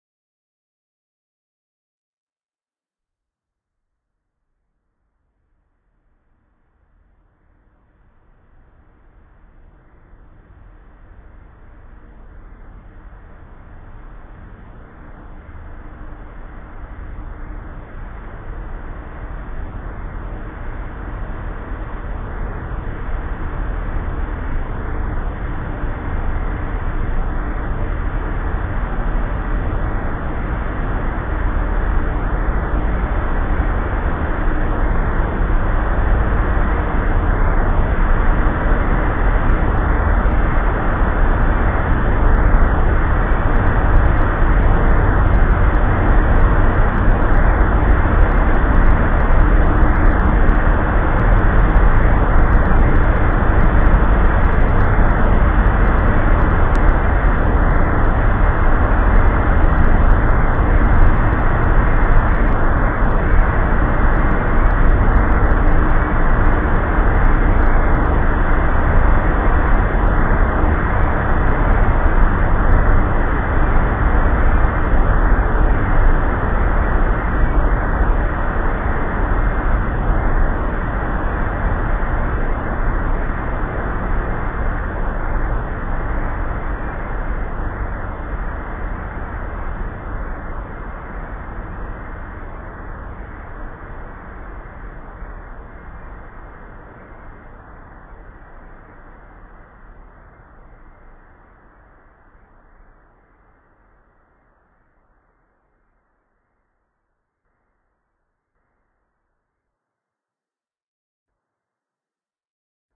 spaceship fly over
The possible sounds of a massive ship hovering from above
alien, ambience, sci-fi, spaceship, drone, space, deep, atmosphere